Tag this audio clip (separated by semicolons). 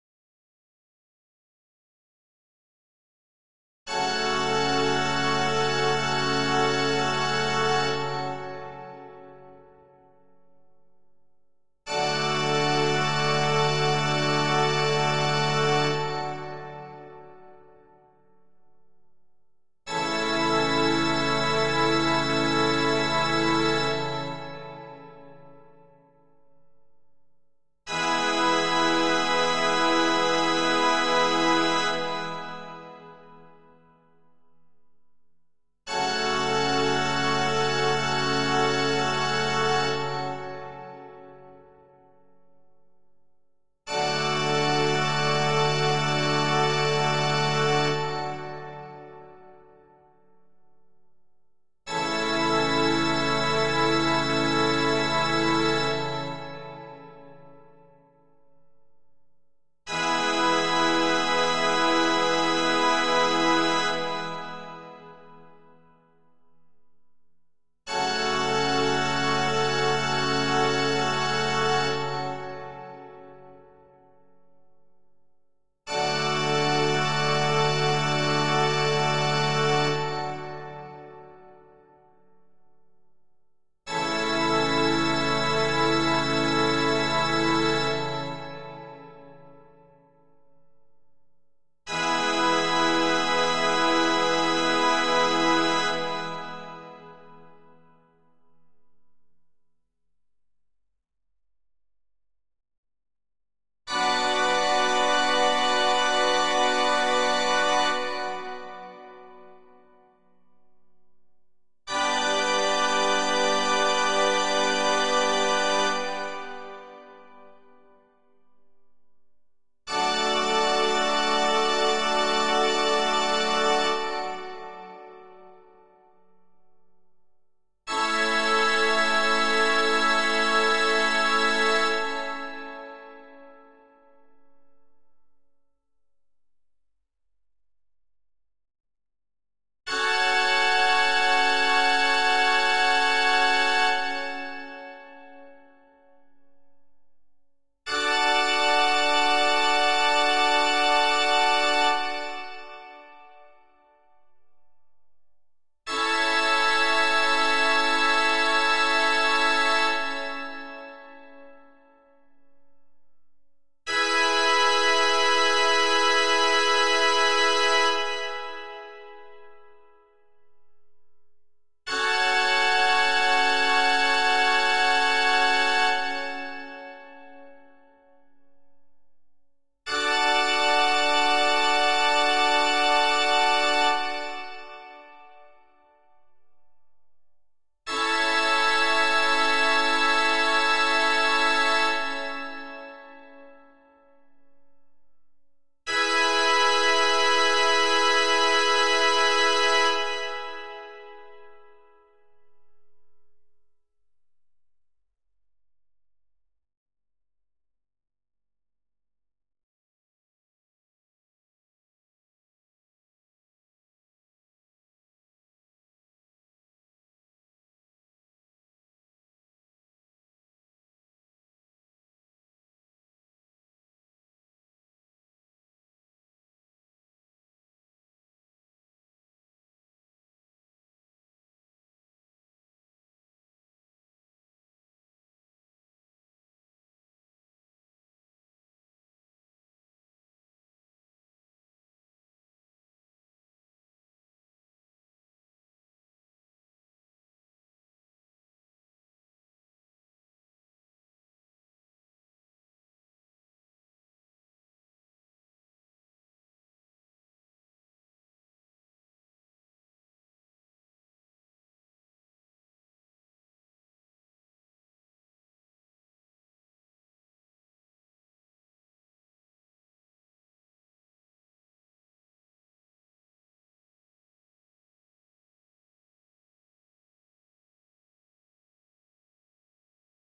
Cathedral; Church; Organ; slow